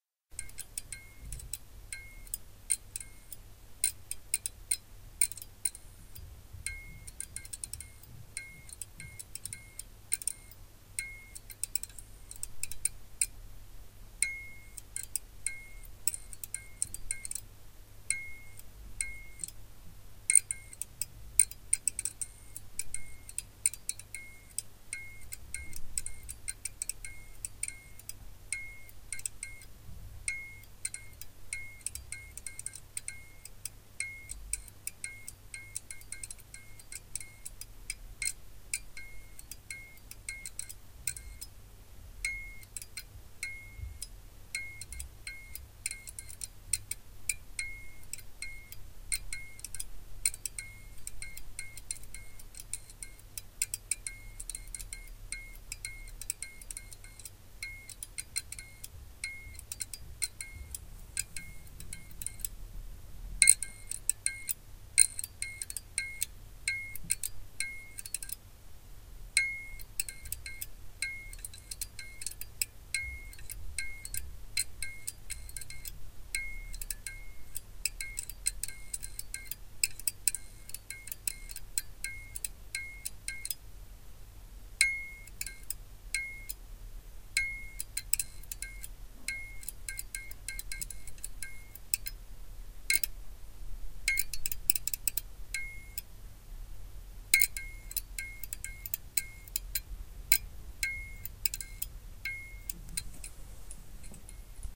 Fluorescent lamp is not working properly and flickering instead at the end of its life.
Recorded by Sony Xperia C5305.